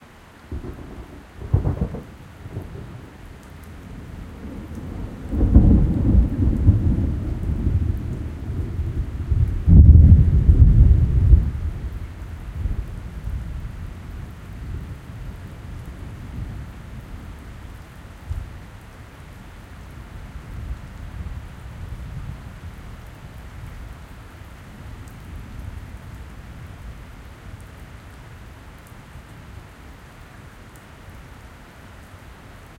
Remix of noted sound (see above). I added + 6dB of compression and make-up gain and lowered the high-frequencies a little. So, a thunder storm rolls in and you grab all your recording gear and rush out to stand under your verandah and realise the rain on the tin roof is too noisy...so you go stand in your garage with the door up (not happy with the rain on the paving which is a bit loud but what can you do)...and wait and wait...and just as the perfect thunder clap happens all the dogs in the neighbourhood start barking, or a car goes past...so you give up and just before the garage door closes you see a huge flash so you quickly stop the door closing and stick the microphone through the 10 cm gap at the bottom…this is what you get. Not great but it's clean and interesting.